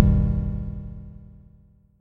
Generated KLSTRBAS 5
Generated with KLSTRBAS in Audacity.
hit, generated